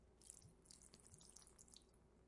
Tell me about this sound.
Liquid pouring on floor 2
A thin stream of liquid being poured onto a floor.